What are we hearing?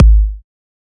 Good kick for techno, recorded with nepheton in Ableton.
freeborn, kick, recorded, studio